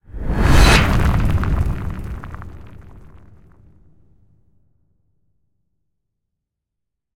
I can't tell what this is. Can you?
Swish hit (13lrs)
Normal swish hit sound. The sound consists of 13 layers. The layers have samples, and synthesis elements.
I ask you, if possible, to help this wonderful site (not me) stay afloat and develop further.
boom, boomer, cinematic, effect, film, filmscore, fx, game, hit, impact, interface, metal, motion, move, movie, riser, riser-hit, score, sfx, sound, sounddesign, stinger, swish-hit, swoosh, trailer, transition, whoosh, woosh, woosh-hit